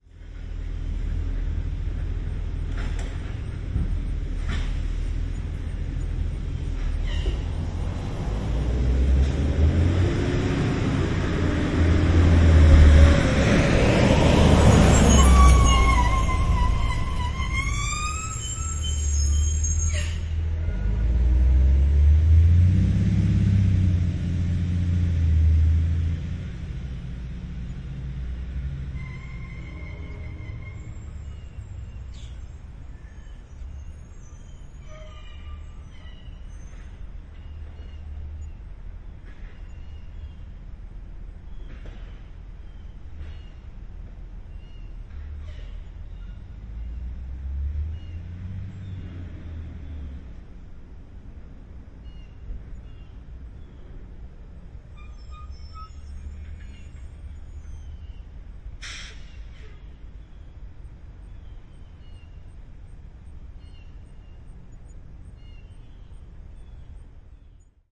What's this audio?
school bus with squeaky air brakes passing from left to right
A school bus passing from left to right with very squeaky air brakes on a quiet residential street. Recorded as I was testing a new rig on August 14, 2007 -- Zoom H4 and a pair of Cad M179 microphones on a home-made Jecklin disk.